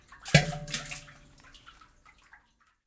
Dropping stone in well 3
Dropping stone in well